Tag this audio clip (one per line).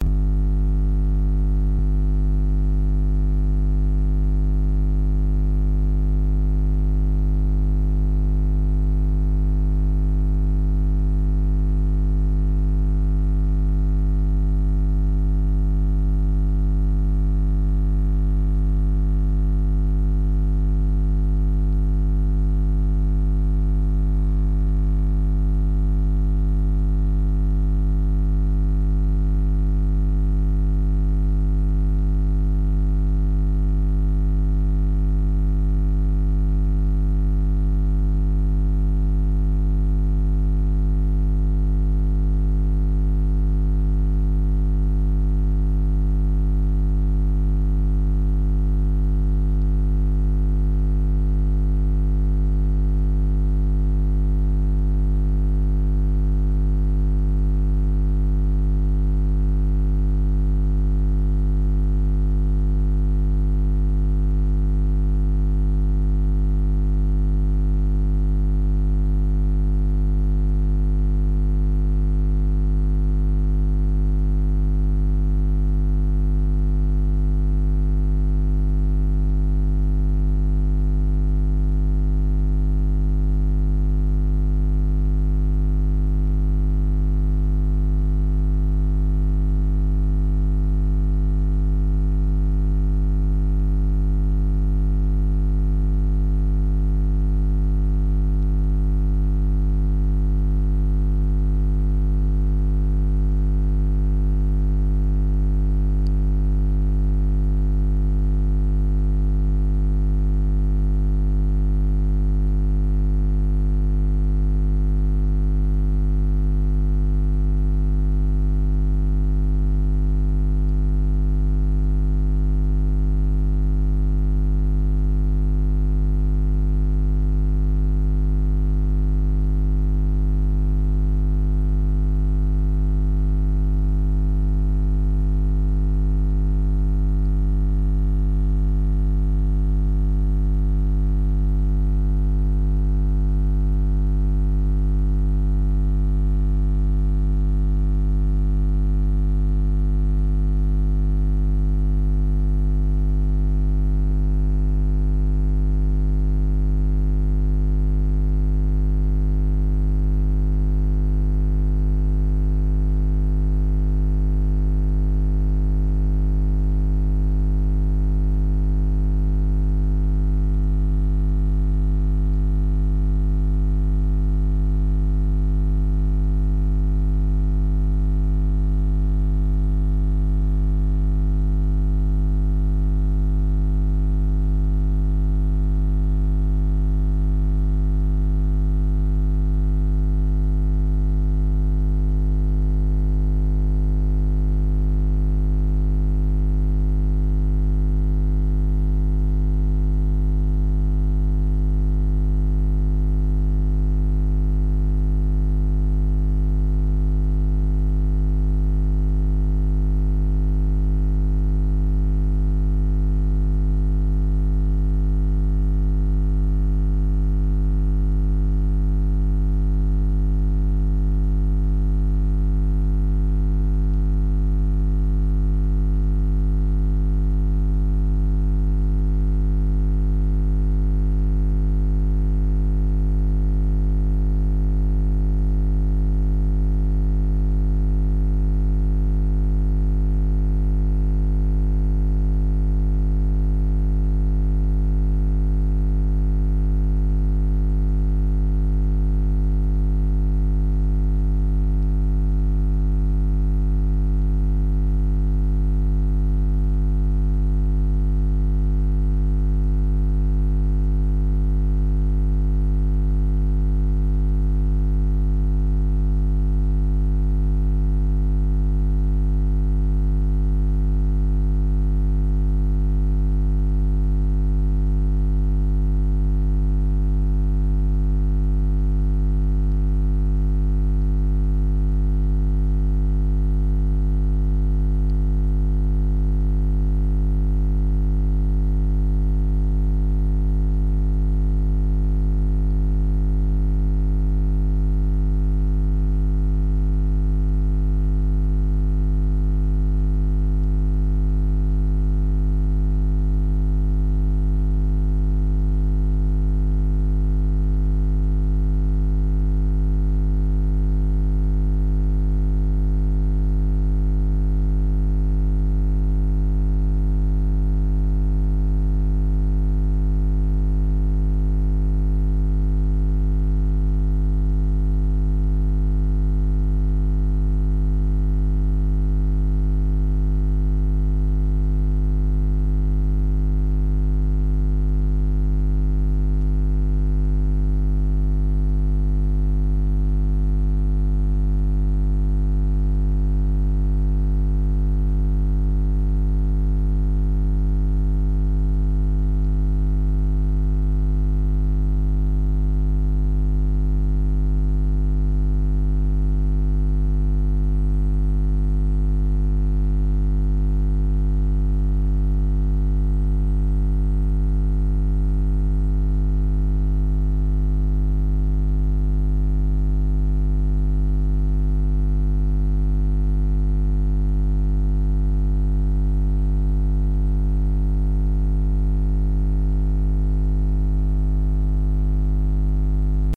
Unit
Alveolus
Rheology
Circuit
Shelf
Signal
Engine
Dual
Battery
Model
Energy
R-Type
Cell
Jitter
Light
Flexfuel
Efficiency
UTV
Path
Electric
ATV
Pulse